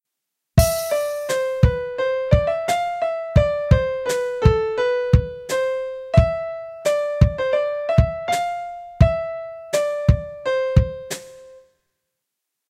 Piano Keys x7
Me doing a loop with my right hand on the piano. contains chords and drums. ( can be used in conjunction with Piano Keys x1 x2 x3 x4 x5 x6)
*Nicholas The Octopus Camarena*
Nicholas "The Octopus" Camarena
keys, drums, sample, beat